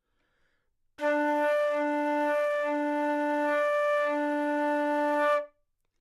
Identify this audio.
Flute - D4 - bad-richness
Part of the Good-sounds dataset of monophonic instrumental sounds.
instrument::flute
note::D
octave::4
midi note::50
good-sounds-id::3165
Intentionally played as an example of bad-richness